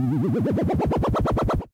jockey this bass!
A wobbly wobbler sound I used, reversed. It rises in volume progressively and has LFO on panning so that it switches channels very fast.
I also have the non-reversed version, if you are unable to reverse a wave file.
FL Studio.
wub, wob, dubstep-sample, wobbler, uprising-volume, dubstep, wobbly, jockey, lfo, reverse